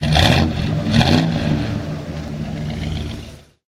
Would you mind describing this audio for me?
Idle rev sound.
auto, automobile, burble, car, dragster, drive, engine, fast, hot-rod, idle, ignition, machine, modified, motor, mustang, racing, rev, revving, start, starting, throaty, tuned, vehicle, vroom